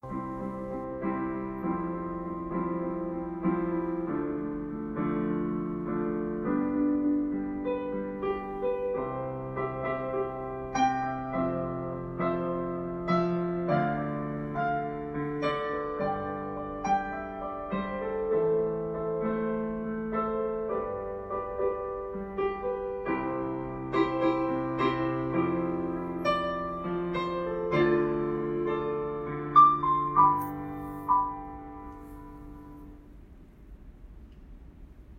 Piano Playing
This is a friend of mind playing the piano.
field-recording, music, piano